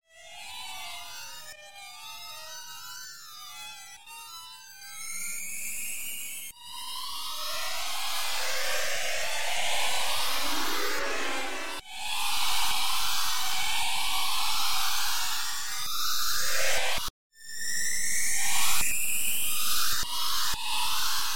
Samples of mosquitos run through a granular effect.